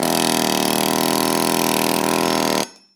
Pneumatic hammer - Deprag zn231 - Forging 1
Deprag zn231 pneumatic hammer forging red hot iron once.
deprag, work, hammer, motor, 80bpm, blacksmith, pneumatic, 1bar, labor, tools, metalwork, blunt, forging, pneumatic-tools, metal-on-metal, impact, air-pressure, crafts